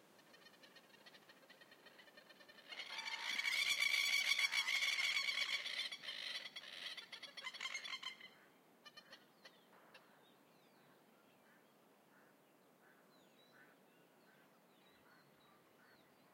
Group-Attack Masked Lapwing
Group of 4 angry Masked Lapwing plovers chase off a lone Lapwing interloper. Lots of running and outstretched wings. Recorded on the Riversdale Estate Vinyard, Tasmania (Australia).
vanellus-miles, masked-lapwing, spurwing-plover, bird